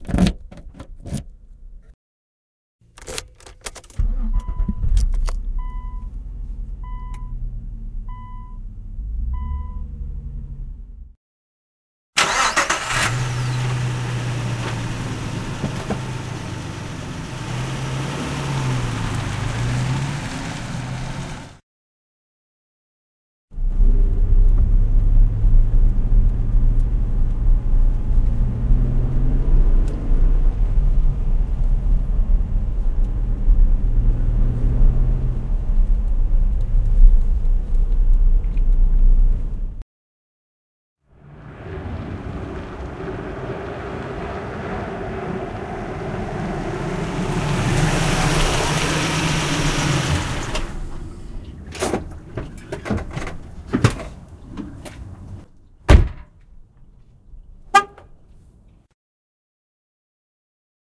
jf Automobile Sequence-Nissan Xterra
An automobile sequence, Nissan: door, keys, beeps, ignition, gear, driving away, driving, arrival, stop, e-brake, door open, door close, alarm beep.
ignition, alarm-beep, gear, arrival, beeps, alarm, driving, car, automobile, emergency-brake, xterra, stop, nissan